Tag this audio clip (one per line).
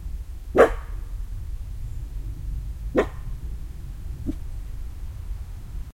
barking-dog
dog
field-recording
nature